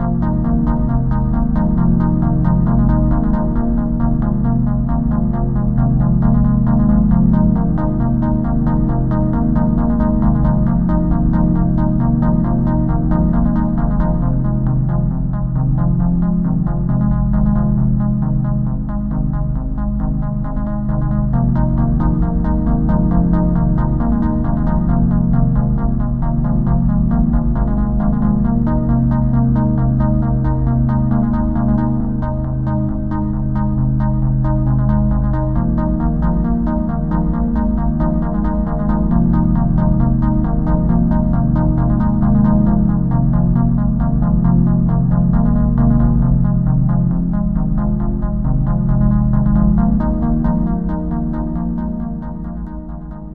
A rhythm midi made at the keyboard then processed through the DN-e1 virtual synthesizer in MAGIX Music Maker daw.
beat, happy, experimental, rhythmic, upbeat, electronic, rhythm, synth, attention-getting, synthesizer, dance, atmosphere, electro, loop, pad, processed, fast, bpm